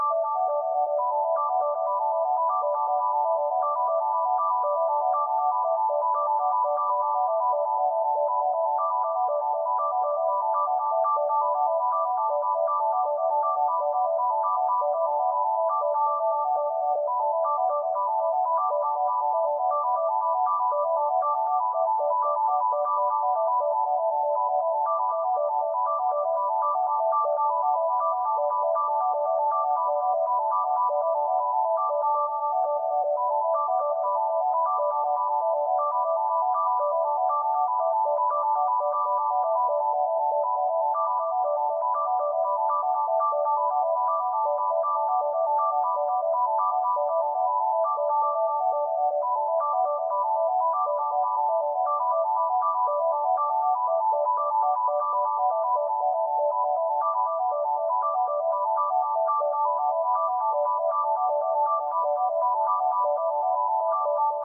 I created this sound on Online Sequencer and then I used Audacity to add some effects to it.